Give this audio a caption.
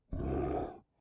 Deep Exhale 1
Deep Exhale Creature Monster
Deep, Exhale, Monster